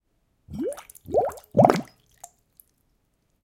water bubbles 03
bubble, bubbles, bubbling, water, liquid